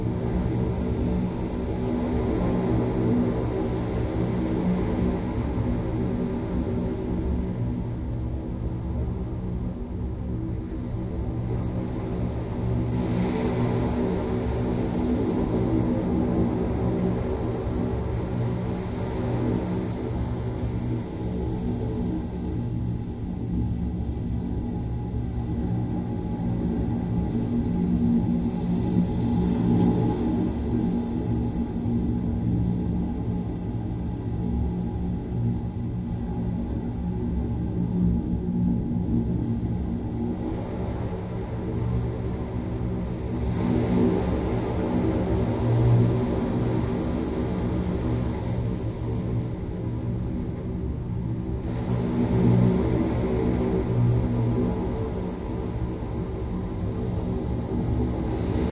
san francisco's wave organ at low tide
ambience, field-recording, ocean, resonance, sea, tube, wave, waves